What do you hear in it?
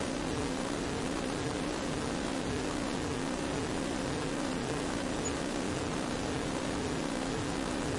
Strange piercing sort of sound, idk get creative I'm sure you'll find a use for it in your game, movie or whatever it is you're making!
Josh Goulding, Experimental sound effects from melbourne australia.